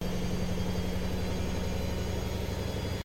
washing machine D (monaural) - Spin 3
field-recording, high-quality, washing-machine